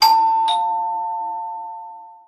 I've edited my doorbell recording in Audacity to (sort of) tune it to an octave from C to B, complete with sharp notes.
ding, asharp, ring, dong, a, tuned, bell, door-bell, bong, house, door, ping, doorbell, octave, bing, chime, ding-dong